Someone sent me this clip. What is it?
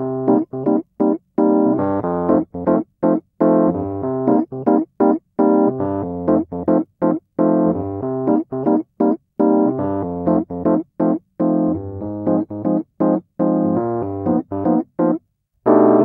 3er Ding 01
Funky Loop played with Rhodes MK 1
Recorded via DI Box
funky, fender, rhodes, minor